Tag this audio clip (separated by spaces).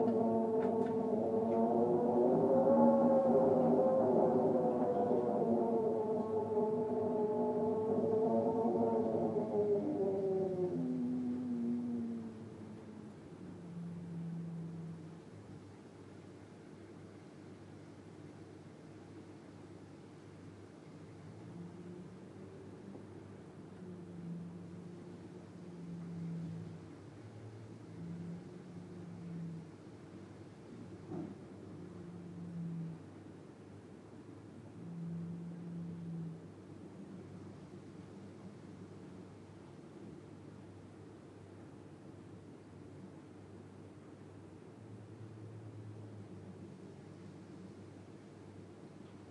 horror night recording spooky tube wind